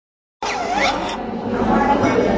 An audio of an automatic hand sanitizer dispenser that resembles a sci fi robot sound.
machine, hygiene, sci-fi, hand-sanitizer, robot